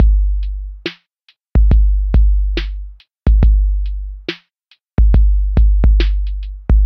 Drumloop Lo-fi base 1 - 2 bar - 70 BPM (swing)
Beat with old electronic elements (808)...